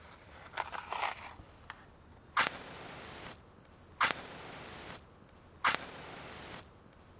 13GBernardD sirky
matches, burning, burn, fire